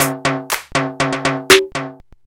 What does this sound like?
Food beats 3
Roland MC-303 drumkit.
old-school, mc303